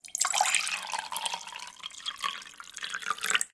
37 - 13 Glass fill
Sound of filling the glass with water
water, Czech, Pansk, Panska, glass, CZ, fill